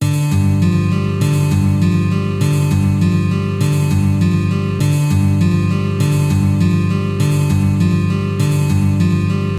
Acoustic Guitar melodic base 1
The Key note is G, recorded with Audacity and edited in Adobe Audition...useful for "backstage" scenes.
Acoustic-guitar, backsound, loop, melody, sequence